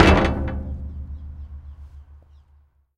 Recorded with a Sony PCM-D50.
Jumping on a big rusty plate on a construction site.